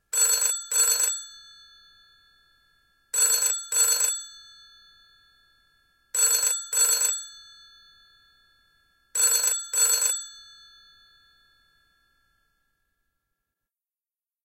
old telephone bell (british version)
A remix of Fonogeno's "old telephone bell" - timing adjusted to sound like a British phone ring.
ORIGINAL:
soundforge8
ring, phone, telephone, household, bell